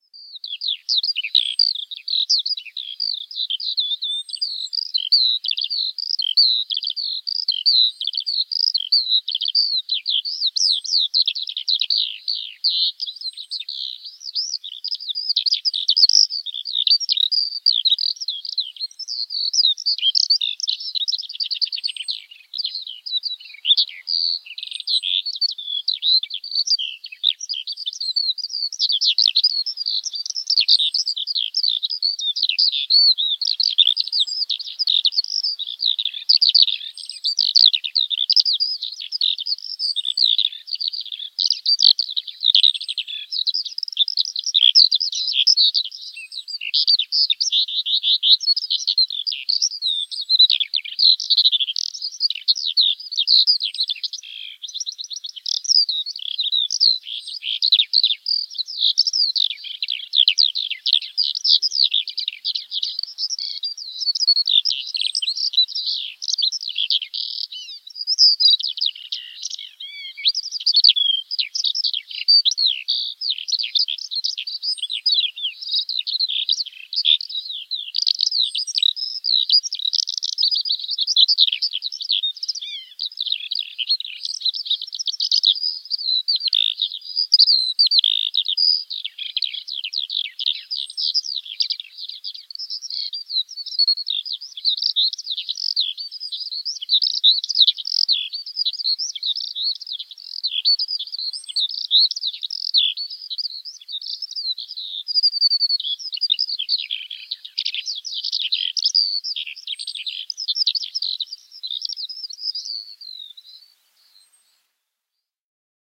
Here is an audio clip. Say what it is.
A dual mono field recording of a skylark singing on the wing. Rode NTG-2 > FEL battery pre-amp > Zoom H2 line in.
alauda-arvensis, bird, ehedydd, field-recording, mono, skylark, song